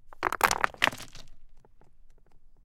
Stein Aufschlag mit langem Decay 08
Recorded originally in M-S at the lake of "Kloental", Switzerland. Stones of various sizes, sliding, falling or bouncing on rocks. Dry sound, no ambient noise.
debris, sliding, fall, nature, movement, close-miking, stone, boulders, hit, bouncing